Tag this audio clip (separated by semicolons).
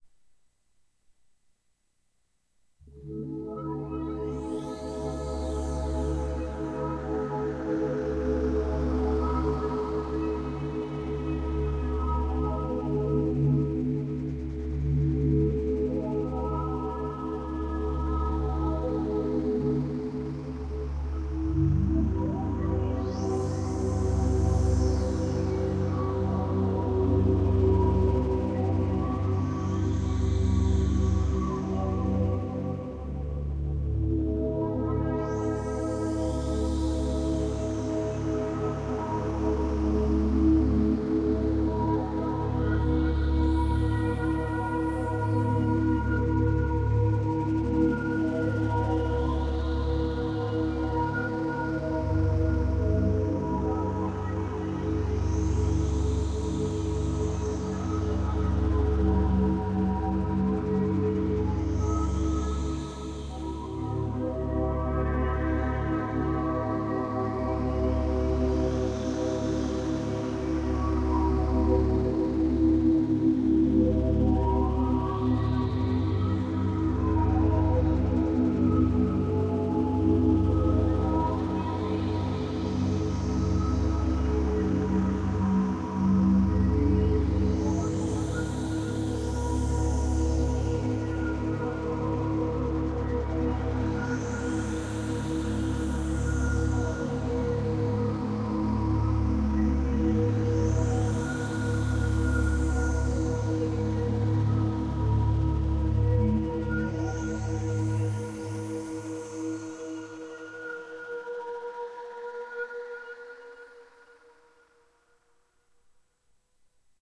relaxation; loop; ambience; music; atmosphere